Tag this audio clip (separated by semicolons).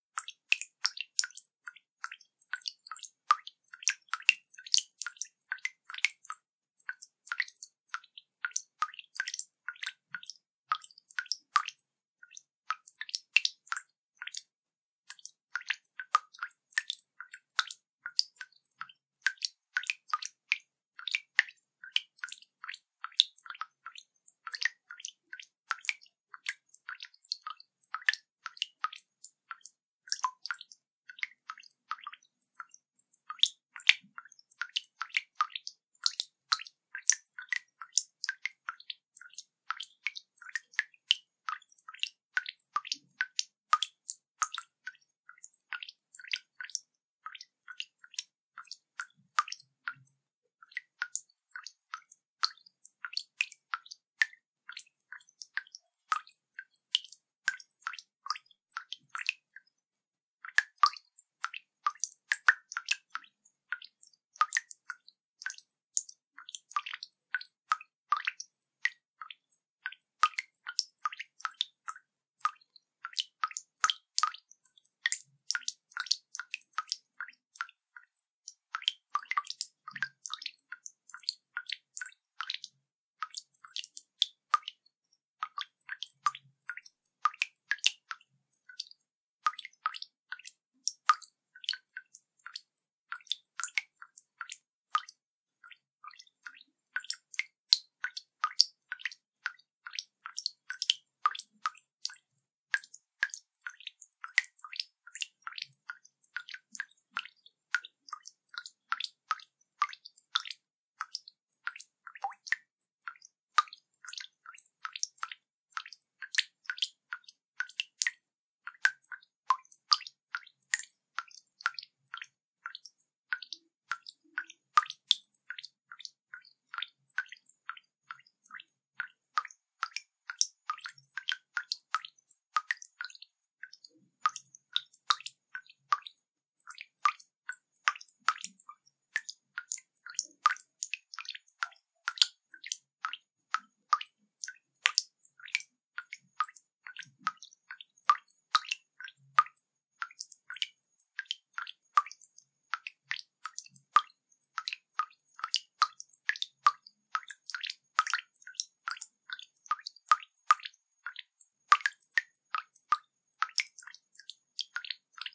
dripping; drops; faucet; irregular; sink; tap-water; water